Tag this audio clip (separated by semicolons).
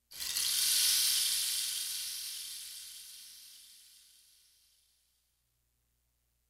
real; rain; rainstick; sounds